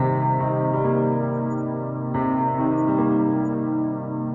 s piano loop 2
My record-tapeish Casio synth’s piano one more time! And it loops perfectly.
calm, loop, phrase, piano, reverb, tape